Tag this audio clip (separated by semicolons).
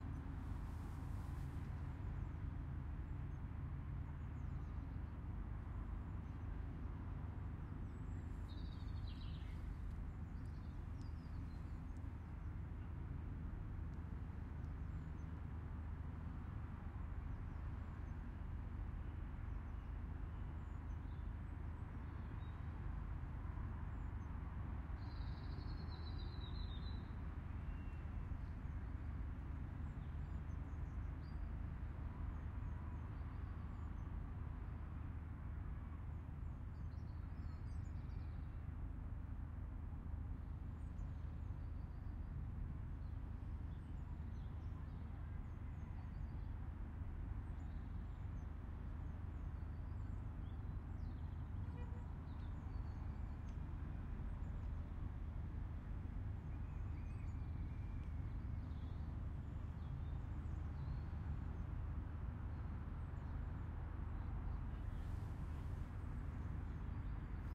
suburban; ambience; day; time; atmos; london; ambiences